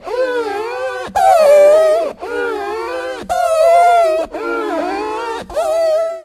Rubbing glass with fingers. Recorded onto HI-MD with an AT822 mic and lightly processed.
request, rubbing